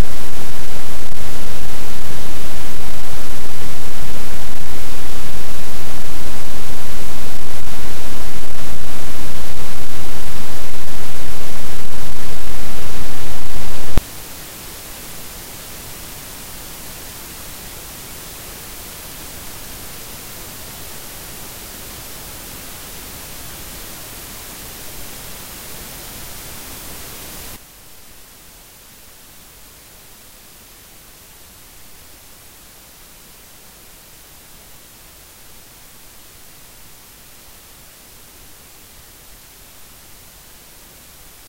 Computer Noise WiFi and Soundcard
My desktop PC's front audio out sometimes (depending on the wifi connection) makes various sounds, which I tried to record... Not sure I was successful.
device, computer, rapid, generated, strong, radiation, interference, wave, loud, disruption, hiss, danger, energy, wlan